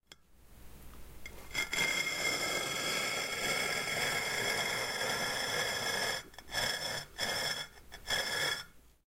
This is two slabs of concrete , one on top of the other, and scraped together. Brings to mind a stone tomb being opened (or a concrete coffin)
slab, cement, sliding, tomb, scraping, concrete